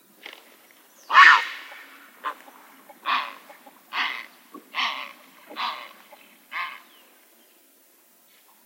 20081221.grey.heron
harsh cries by one Grey Heron. Recorded near Caño de Guadiamar, Doñana National Park (Spain) using Sennheiser MKH60+MKH30 into Shure FP24, Edirol R09, decoded to mid-side stereo with free Voxengo VST plugin
grey-heron, south-spain